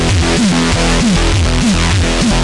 A little loop I accidentally made while making sounds for a game :P
BPS: 165
App Used: FL Studio 12
Extensions Used: Harmor, Edison